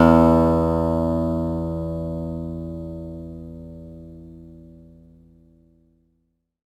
Sampling of my electro acoustic guitar Sherwood SH887 three octaves and five velocity levels